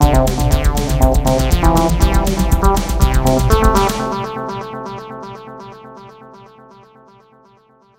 Piece of a composition I did with software.